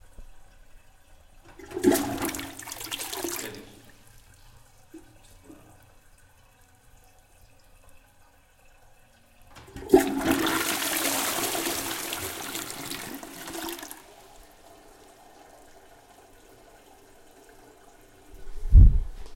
Flushing device
flush, toilet